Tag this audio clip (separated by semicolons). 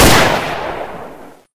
BULGARIA; F2000; FN